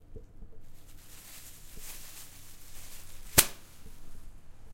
9. Bag seperating
Separating two plastic bags
bag, crackle, plastic, tearing, wrap